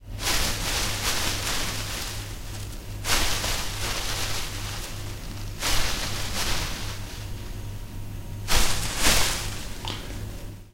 HOT SIGNAL.Leaf sounds I recorded with an AKG c3000. With background noise, but barely noticeable when played at lower levels.When soft (try that), the sounds are pretty subtle.
bush, bushes, noise, shrubbery